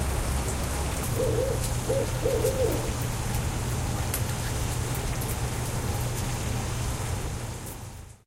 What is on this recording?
Rain is noisy, but owl can be heard clearly a few seconds into the track. Recorded late at night in Durham, NC on the front porch.